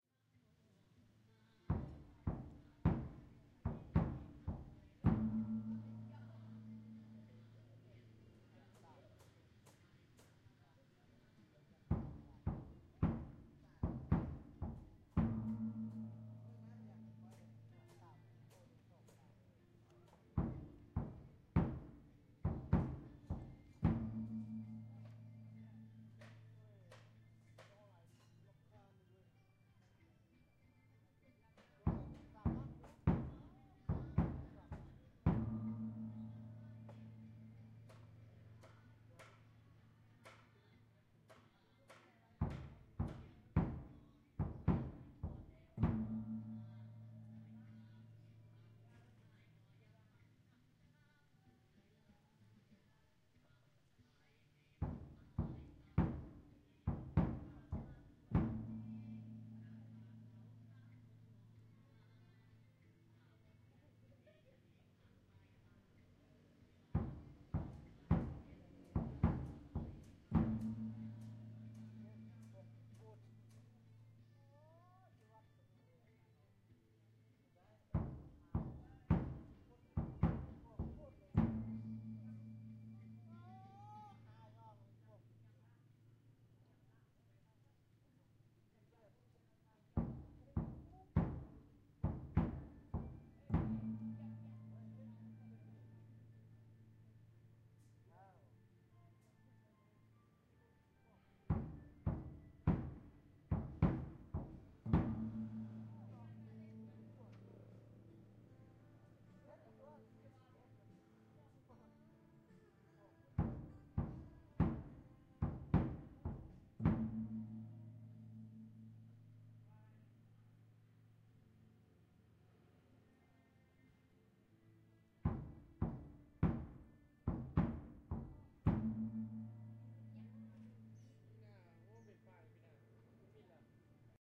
Drums and gon in a buddhist monastery, slow
Percussion "Loop" with drum and gong in a buddhist monastery in Lao. Some people talk in the background